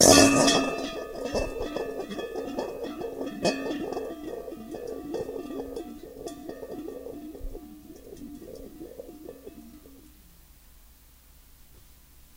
Having a 1 1/2" hard plastic Bocce ball (a wonderful Italian yard game) nearby, I thought I'd try tossing it into the bowl, held in the air horizontally by 3 wires, recording it's rolling around. This worked quite well.
When I come up with a better securing for the bowl in the air, I'll try it again with a greater throwing force as well hopefully with some marbles.